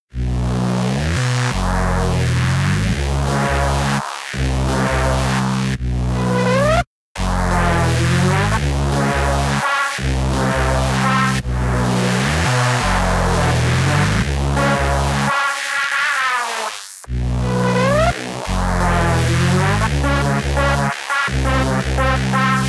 Bass an Synth
a bass and synth lead. made with reason samplers.
bass, fx, synth